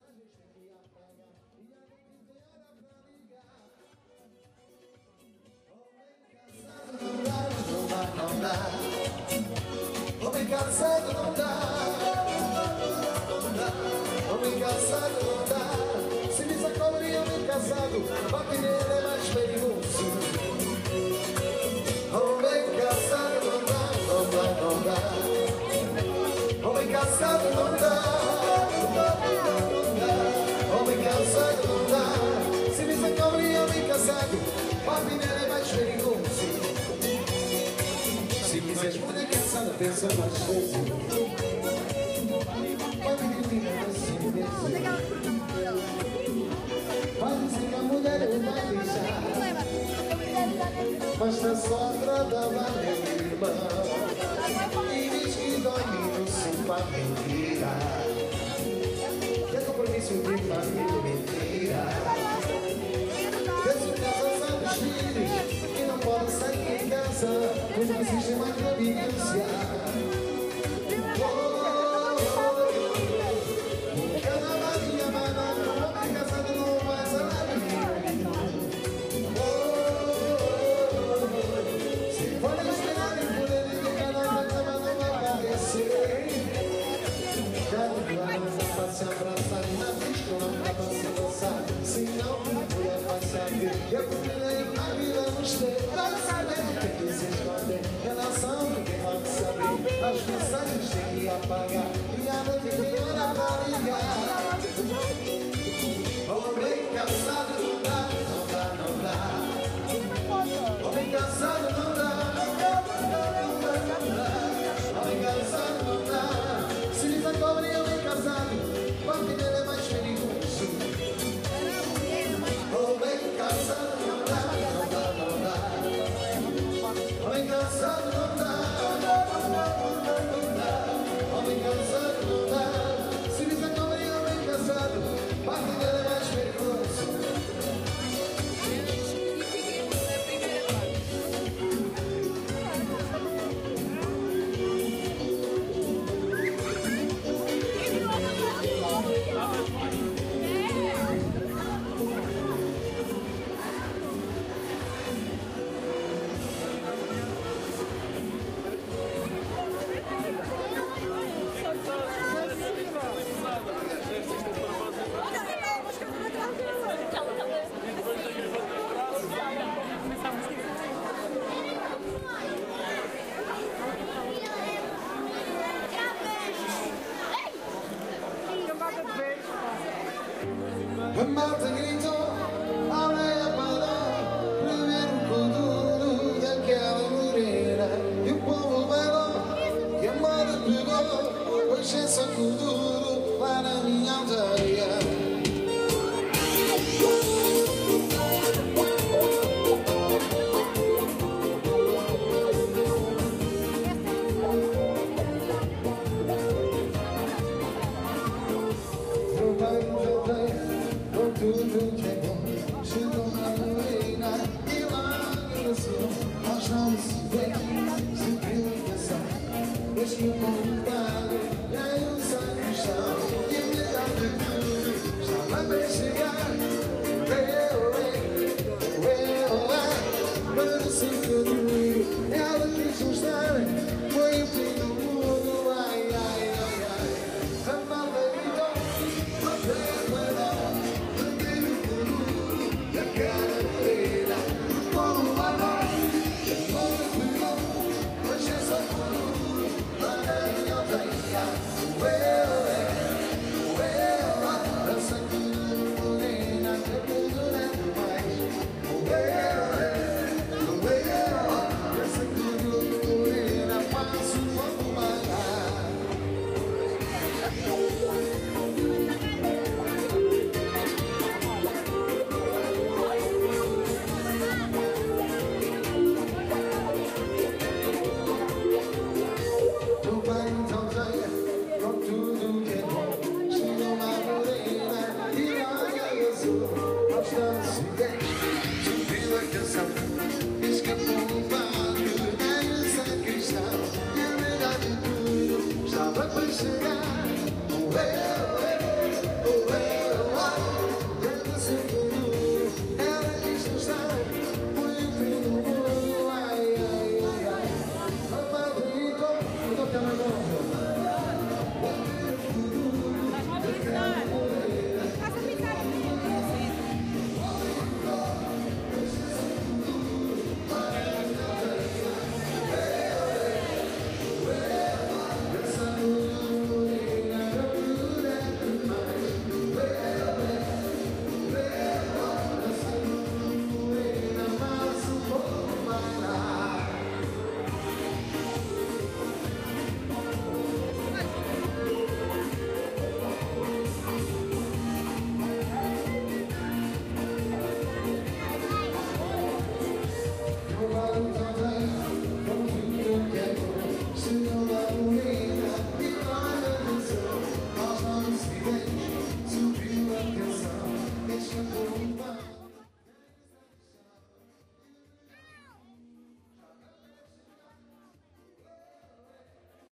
130615-caminhar mouraria santos populares lisboa
walking in mouraria during santos populares
mouraria, portugal, lisboa